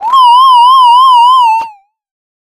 Attack Zound-116
Similar to "Attack Zound-115", but lower in frequency. This sound was created using the Waldorf Attack VSTi within Cubase SX.
soundeffect, electronic